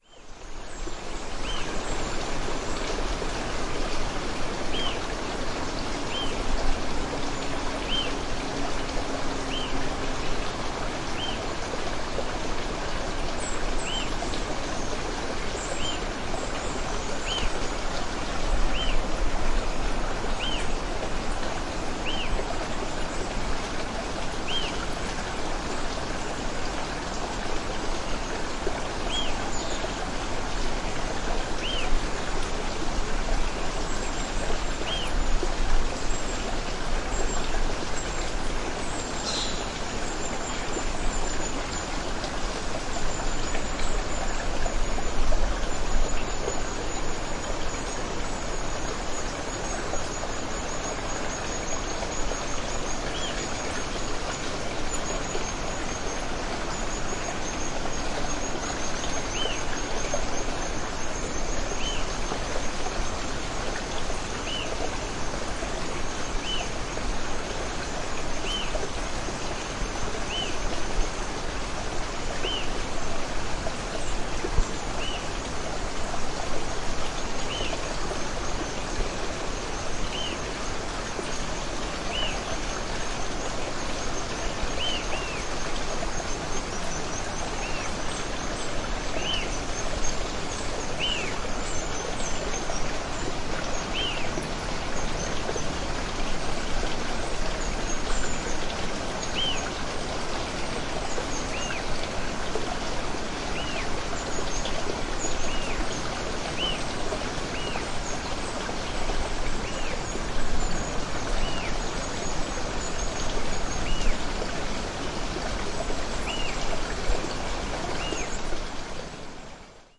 Camino a Futaleufú (riachuelo)
Recorded with the UNI mics on a Tascam DR-100 on Feb, 2012 on a road known as Carretera Austral in Southern Chile.
Cleaned up some noise with iZotope RX.
ambiance, futaleufu, chile, nature, summer, field-recording